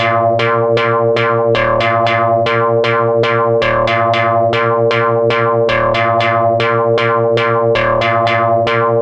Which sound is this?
Some recordings using my modular synth (with Mungo W0 in the core)